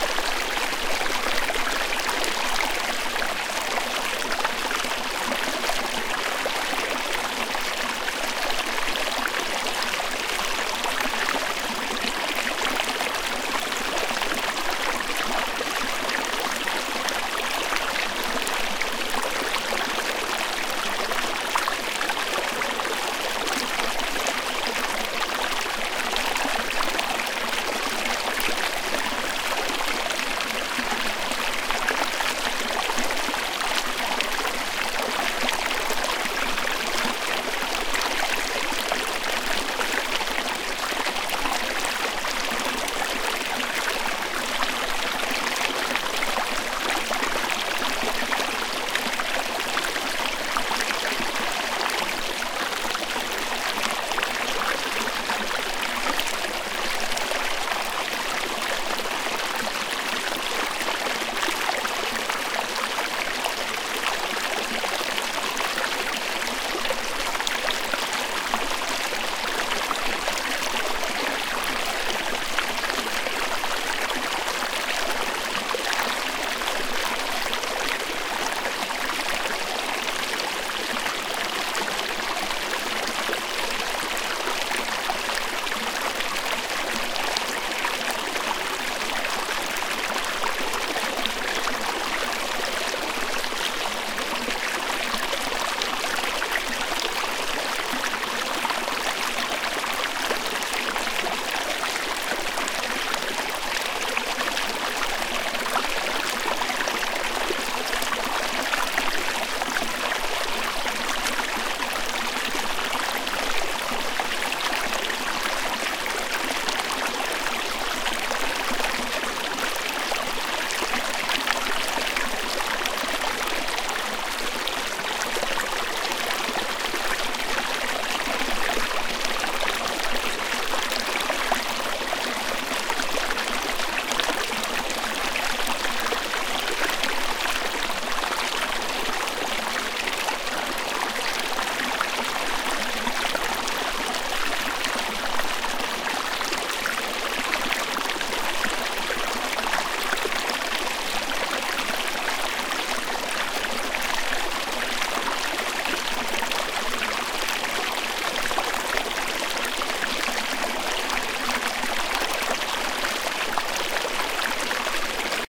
broken top creek 13
One in a series of small streams I recorded while backpacking for a few days around a volcano known as Broken Top in central Oregon. Each one has a somewhat unique character and came from small un-named streams or creeks, so the filename is simply organizational. There has been minimal editing, only some cuts to remove handling noise or wind. Recorded with an AT4021 mic into a modified Marantz PMD 661.
field-recording, liquid, river, trickle, gurgle, brook, babbling, stream, creek, splash, relaxing, ambient